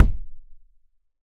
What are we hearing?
KickDrum PlasticTrashBinPlusAboxChirp
This was for a dare, not expected to be useful (see Dare-48 in the forums). The recorded sound here was a plastic trash bin being hit with something (can't remember what). The mixed sound was a generic drum sound I created in Analog Box 2, with low frequencies and a quick down-sweep -- not much more than a thud, really. A lot of editing was done in Cool Edit Pro. Recording was done with Zoom H4n.
kick, bass-drum, drum, kick-drum, drumkit, ElAcHo, Dare-48, percussion, fake, trash-can